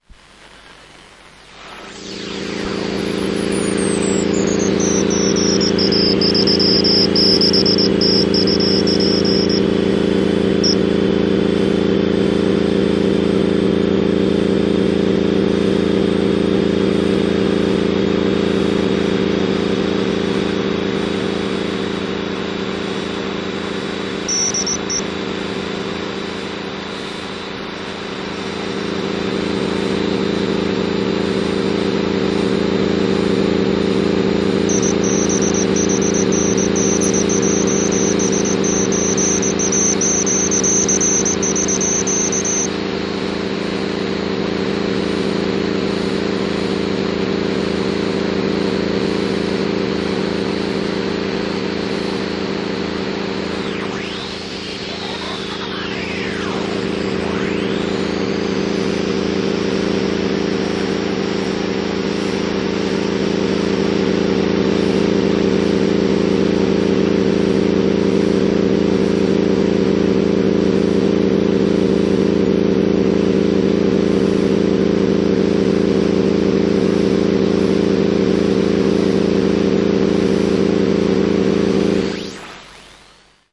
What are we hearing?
Two interferences: repetitive signal beaten and possible morse station.
electronic, morse, noise, radio, shortwave, static